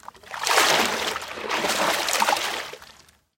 Water slosh spashing-9
water, splash, environmental-sounds-research